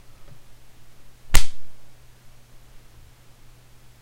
Some fight sounds I made...